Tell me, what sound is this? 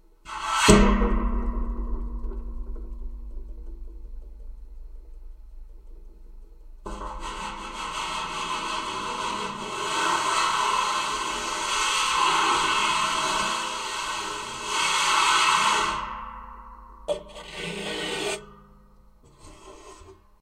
contact mic on satellite dish08
Contact mic on a satellite dish. Rubbing a piece of metal on the satellite dish.
contact-mic, metal, metallic, piezo, scrape, scraping, swish, swishing, tines